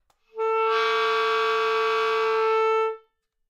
Raw audio of of clarinet multiphonic
bizarre, clarinet, crackednotes, effect, multiphonic, rawaudio, rough, splittone